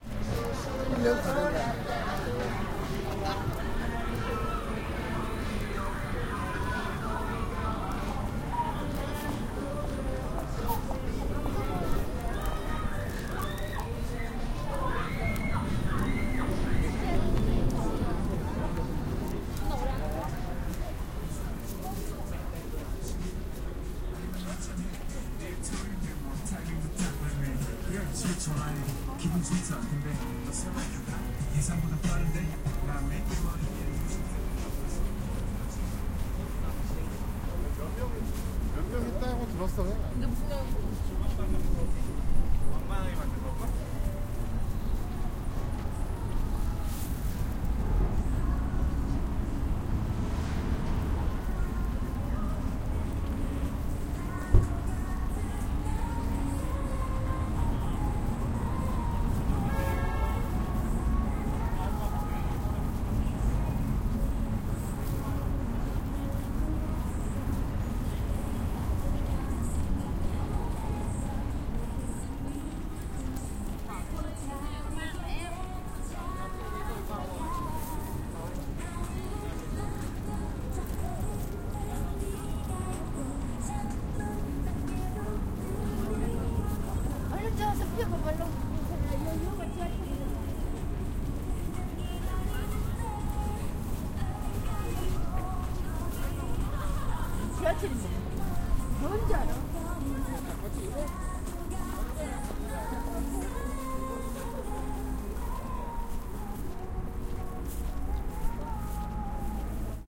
0132 Street Miglore
Crowded street. Music in the street, people walking and talking. Traffic. Some wind noise.
20120121
korean, street, field-recording, seoul, voice, korea